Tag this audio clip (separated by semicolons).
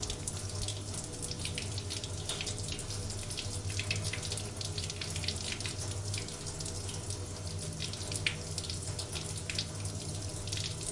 ship; space; abstract; prison